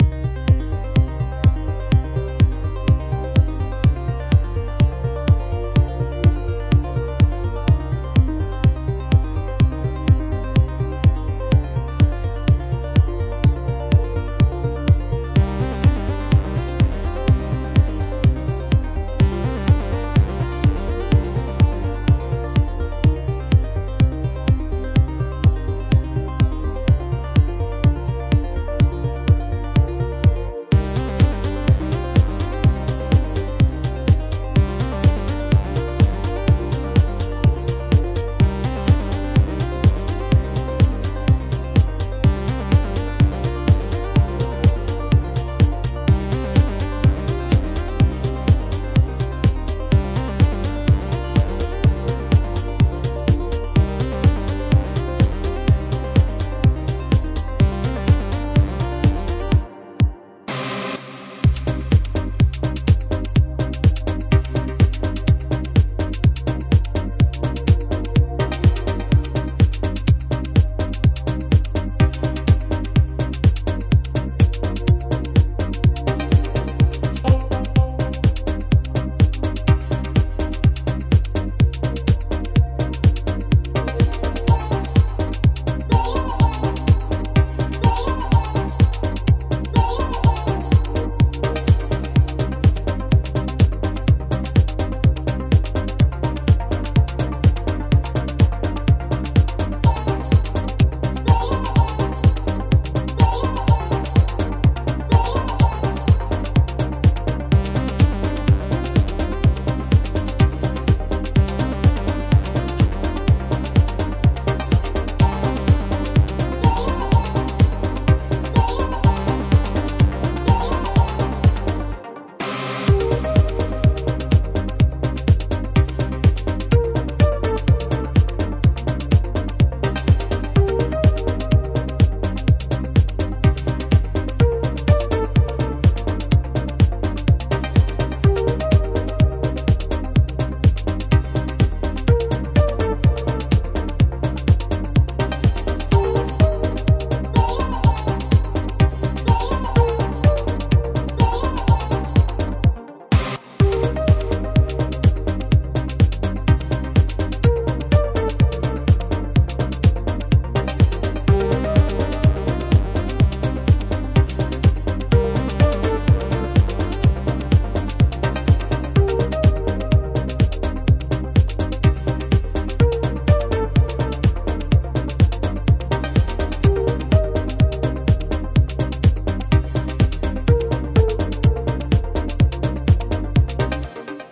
Sounds & House - Loop mode -
Synths : Ableton live,simpler,Kontakt,Silenth1,reason -